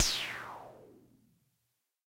EH CRASH DRUM12
electro harmonix crash drum
electro, drum, harmonix, crash